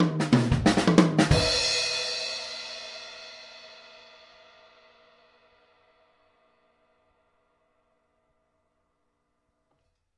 fill - 16sh - crash
Drum fill then a shuffle beat, ending in a crash cymbal.